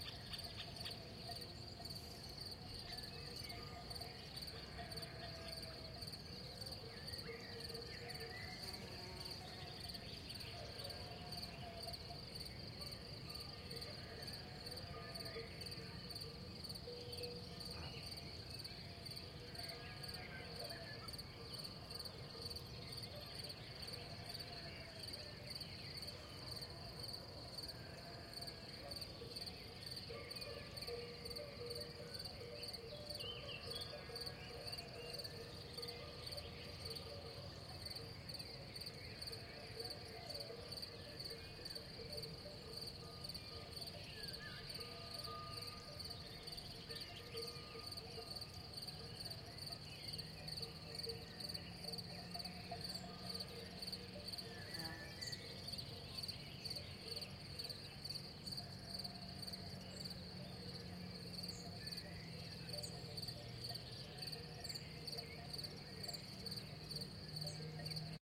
Country side ambient background: crickets, birds, insects. Distant cow bells, sheep, dog barking. Far away car. Recorded on Tascam DR-100MK3, 2x Microtech M930 in ORTF setup.